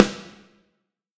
BMSE SNARE 001
Various snare drums, both real and sampled, layered and processed in Cool Edit Pro.